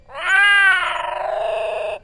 miaowing blackbird1
Short parts cut out of a blackbird song, played with 15 to 25% of the original speed leading to an amazing effect.
slowed, meow, decelerated, reduced-speed, miaow, birdsong, miaowing, cat, bird, delayed